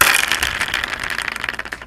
Another sonic visit to my sons toy box with my trusty Olympus recorder. Tags and name describe the item and it's intended (or unintended sound).